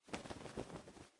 bird flapping 11
Various bird flapping
bird, flapping, wings